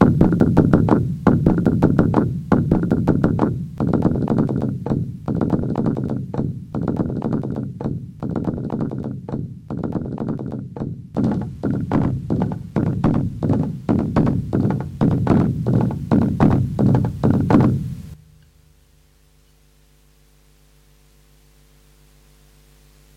Short rhytms. Drumlike instruments.